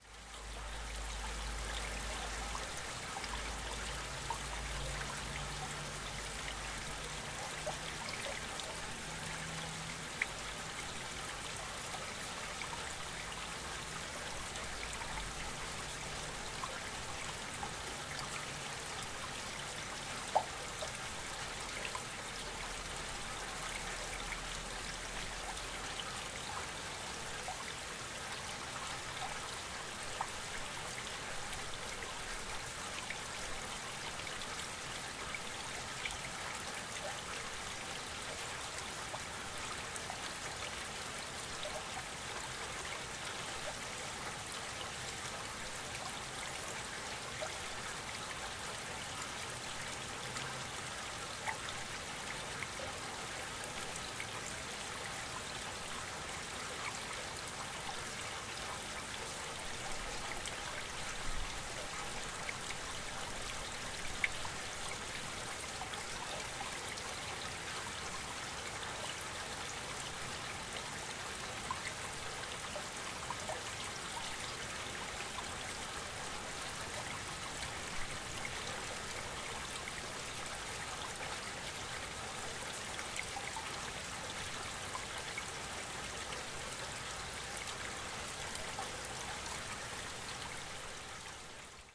25.12.11: about 3 p.m. sound of the watercourse. Mlynska street in Sobieszow (south-west Poland). recorder: zoom h4n. fade in/out.
watercourse, water, stream, fieldrecording